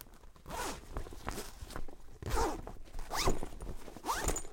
Zipping a Duffle Bag